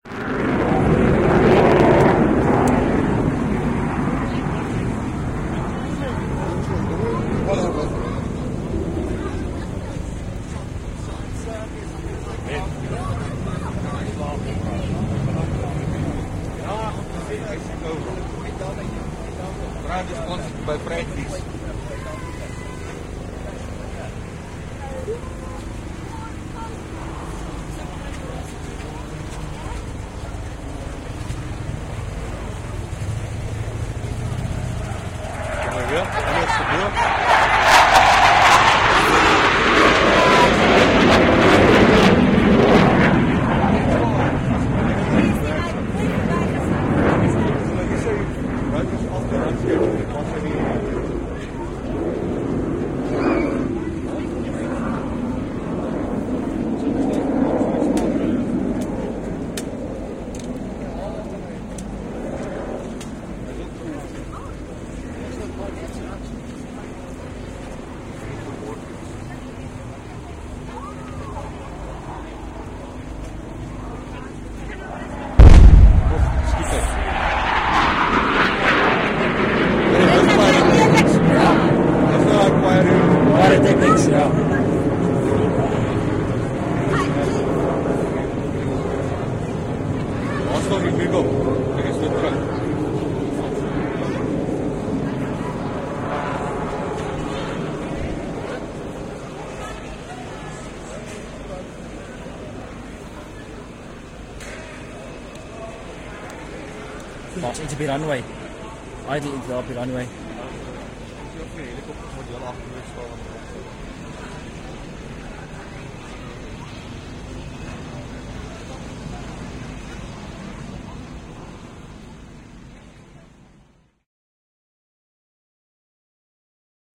Gripen bombingrun1
This a simulated bombing run performed by the Swartkops aAFB in Pretoria South Africa. Recorder used: Philips DVT5000-00
air-force, artillery, bang, boom, Jet, Military, South-africa